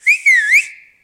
Classic "Hey! Look here," whistle performed with two fingers in the mouth. Close. Recorded with AT C-414 mic to Fostex PD-6.